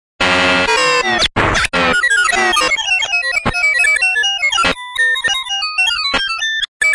Untitled Glitch
An atonal fragment of electronic glitch, loops well (depending on your aesthetic).
glitch, atonal, loop, electronic